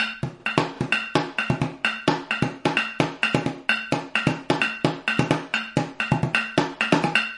IMPROV PERCS 005 4 BARS 130 BPM
Sources were placed on the studio floor and played with two regular drumsticks. A central AKG C414 in omni config through NPNG preamp was the closest mic but in some cases an Audio Technica contact mic was also used. Two Josephson C617s through Millennia Media preamps captured the room ambience. Sources included water bottles, large vacuum cleaner pipes, a steel speaker stand, food containers and various other objects which were never meant to be used like this. All sources were recorded into Pro Tools through Frontier Design Group converters and large amounts of Beat Detective were employed to make something decent out of my terrible playing. Final processing was carried out in Cool Edit Pro. Recorded by Brady Leduc at Pulsworks Audio Arts.
130-bpm, acoustic, ambient, beat, beats, bottle, break, breakbeat, cleaner, container, dance, drum, drum-loop, drums, fast, food, funky, garbage, groovy, hard, hoover, improvised, industrial, loop, loops, metal, music, perc, percs, percussion